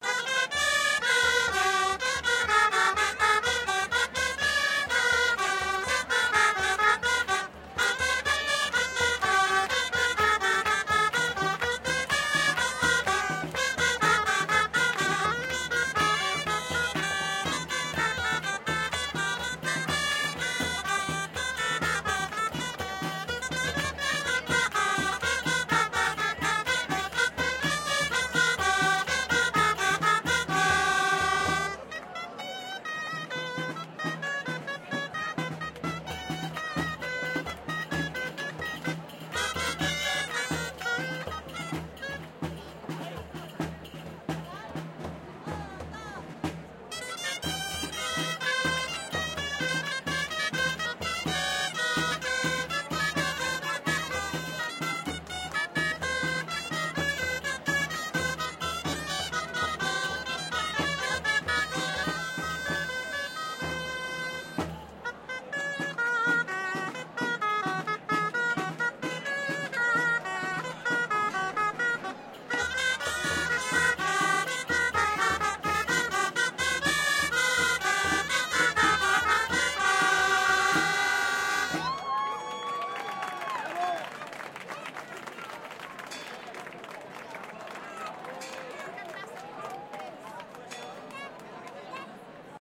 gralles el gegant del pi